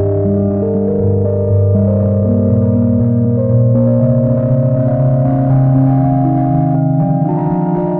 Nord Lead 2 - 2nd Dump